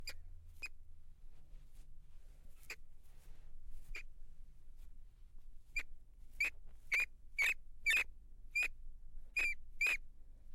Squeaks of pet - manual rat.
Mono.
Microphone: Pro Audio VT-7
ADC: M-Audio Fast Track Ultra 8R